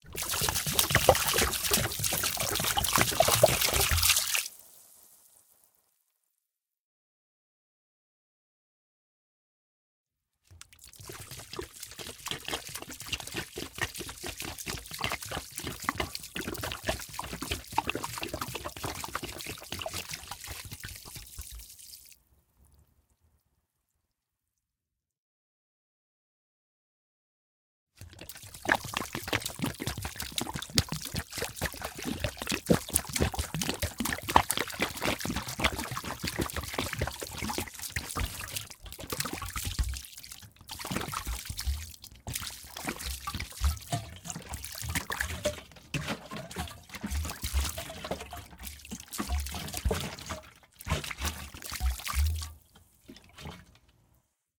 plastic gas container pour gas or water on ground wet sloppy
pour, container, gas, sloppy, or, plastic, wet, water, ground